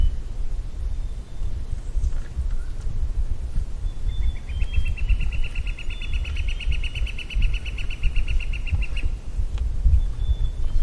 big bear lake - morning ambience and llama chewing 1
A llama chewing on some hay.
llama, ambience